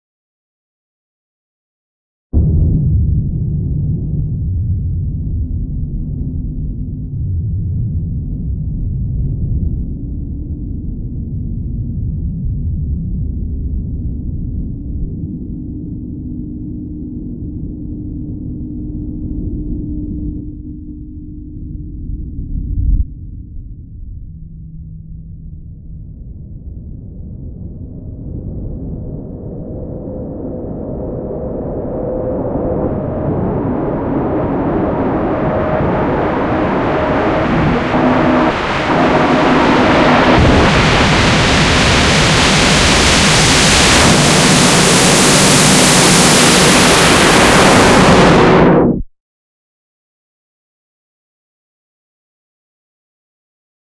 ELECTRONIC-NOISE-filtered-glitch-wall-of-sound06
While outputting a file of sound effects, I ended up with a severely corrupted file. Playback results include incessant shrieking and slight pitch alterations. To create more variety, I used a lowpass filter and long reverb.
block, brown-noise, digital, distortion, electro, electronic, experimental, filter, glitch, lo-fi, noise, overdrive, overdriven, pink-noise, processed, reverb, saturated, saturation, sweep, white-noise